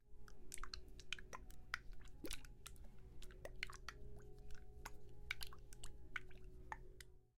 Choque entre agua y arena